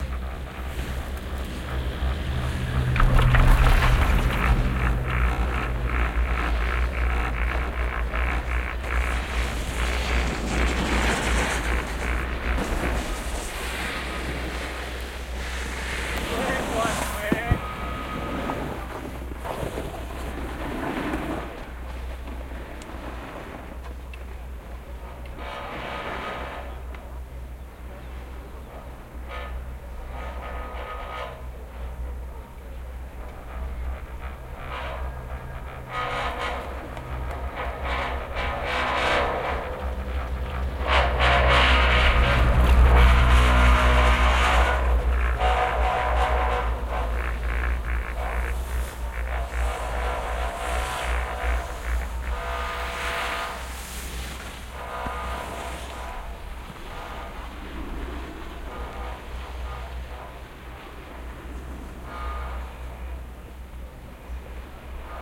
Recording taken at Crystal Mountain ski resort in WA, USA riding up a chair lift using Soundman binaural microphones and an iPhone.
chair-lift, skiing, field-recording, binaural, snowboarding